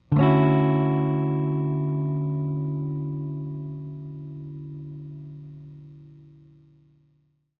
guitar chord 03
A chord played on a Squire Jaguar guitar. I'm not good at guitar so I forget what chord.
chord, electric, guitar, jaguar, sample, squire, strum